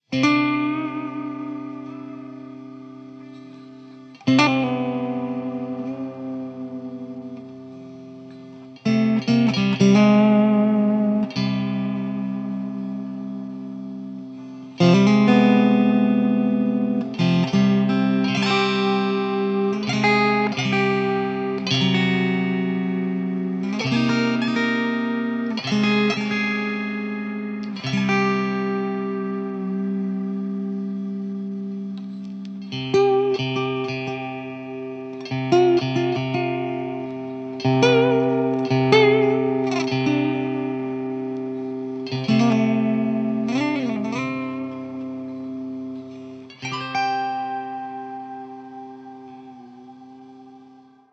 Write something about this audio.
Clean Guitar #34 - Oriental
Acoustic, Acoustic-Guitar, Ambient, Arabic, Atmosphere, Background, Calm, Chill, Cinematic, Clean, Electric, Electric-Guitar, Fantasy, Film, Guitar, Indian, Instrumental, Medieval, Melancholic, Melody, Middle-Eastern, Minimal, Mood, Movie, Music, Oriental, Slow, Solo, Solo-Guitar, Soundtrack